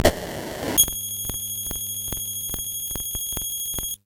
%Chip Mess1
The CPU of this keyboard is broken, but still sounding. The name of the file itself explains spot on what is expected.
homekeyboard, 16, sample, hifi, 44, from, lofi